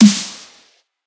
Dubstep Snare drum
It should sound professional enough. It took a little while of analyzing what the snares had in those tracks, and I managed to pull this off after a while.
I hope you appreciate my small effort for this day :)
Have fun with it.
snare, pro, step, drum, dub, mainstream, house, techno, mixed, powerful, electro, genre, professional, hit, mix, dubstep